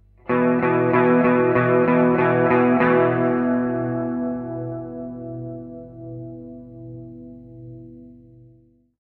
Single note with its 4th
Just a B with its 4th note.
4, Electric, guitar, note